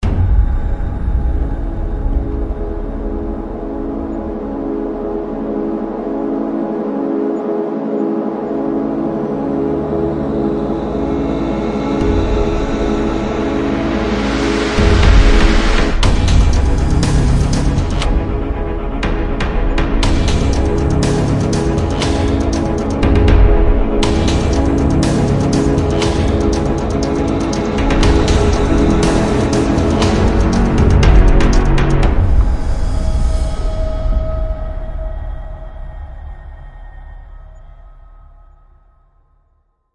Here We Come
my own composition describing an army march.